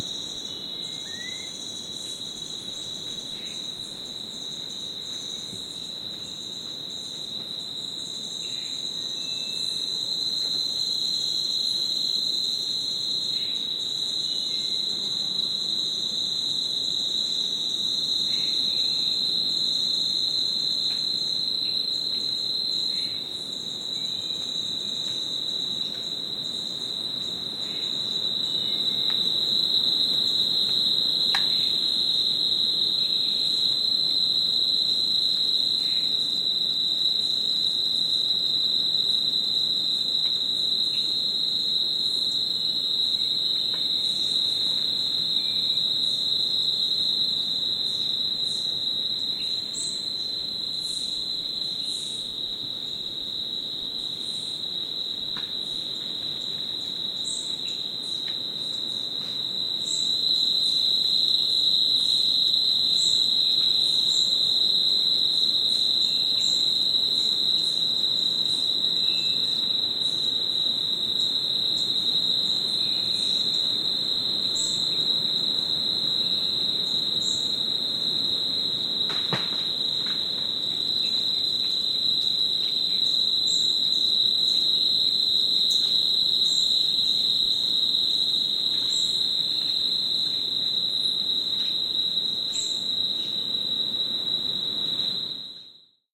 An ambient field recording from the cloud forest near Monteverde Costa Rica.
Recorded with a pair of AT4021 mics into a modified Marantz PMD661 and edited with Reason.